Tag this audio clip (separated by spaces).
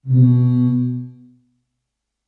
ambiance sound